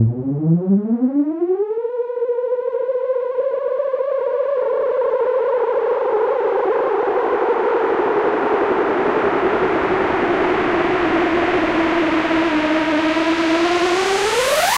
syn whoosh abrupt end 03
A buildup Whoosh!